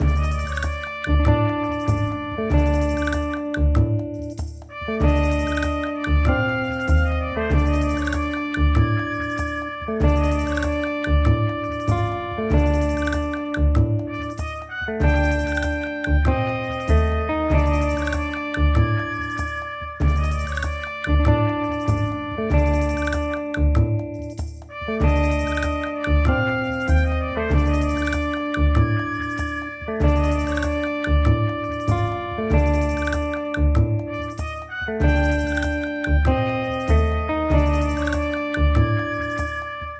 Desert Snake
High noon in snake town. Watch out for the eagles soaring overhead!
desert, game, music, loop, background, western, guitar, mexico, snake, trumpet, wild-west, cartoon, shaker, eagles